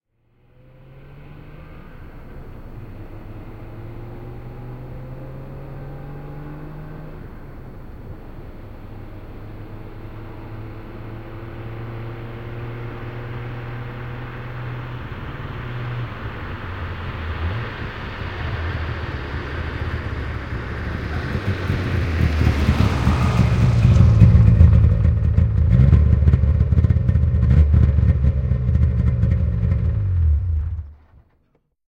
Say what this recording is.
Henkilöauto, tulo asfaltilla / A car approaching, stopping, exhaust, shutting down, Saab 96, a 1966 model
Saab 96, vm 1966. Lähestyy asfaltilla, pysähtyy kohdalle, hetki tyhjäkäyntiä, pakoputki, moottori sammuu. (Saab 96, 2-tahti, 45 hv, 850 cm3).
Paikka/Place: Suomi / Finland / Järvenpää
Aika/Date: 17.10.1995
Autot, Finland, Field-Recording, Finnish-Broadcasting-Company, Yleisradio, Tehosteet, Autoilu, Auto, Yle, Soundfx, Cars, Motoring, Suomi